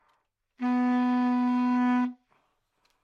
Sax Baritone - C#2 - bad-richness
Part of the Good-sounds dataset of monophonic instrumental sounds.
instrument::sax_baritone
note::C#
octave::2
midi note::25
good-sounds-id::5516
Intentionally played as an example of bad-richness